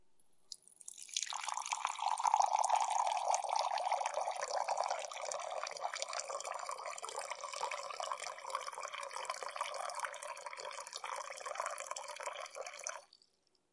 filling cup of water - liquid - pouring
agua, copo, water, de, faucet, pour, torneira, cup